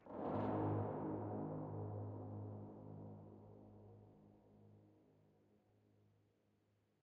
Violin short horror 1 note
Violin long horror 2x note.
Software: FL Studio. Bpm 120